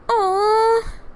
No talking, just a woman/girl going 'awww' in disappointment.